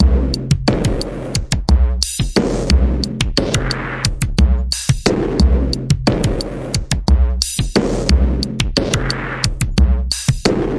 beatdown mgreel
Formatted for the Make Noise Morphagene.
This reel consists of a spliced drum rhythm. The final splice is the whole loop without any splices.
Distorted and super compressed modular drums.
compressed, distorted, mgreel, morphagene, synth-drums